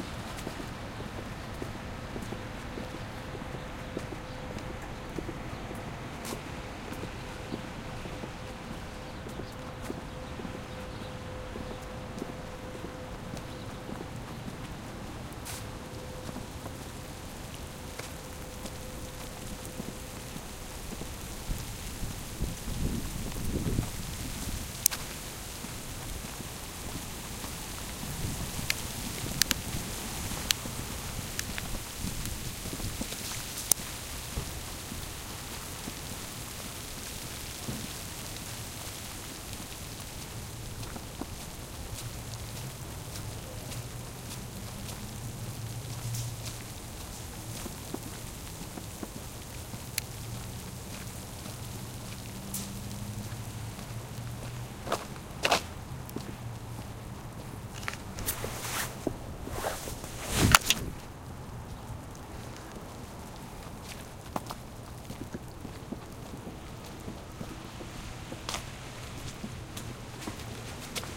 if you listen closely to the recording you will hear a small snow storm start and stop.
enjoy.
recorded with a sony pcm dictaphone
nature, winter, cold, field-recording, weather, snow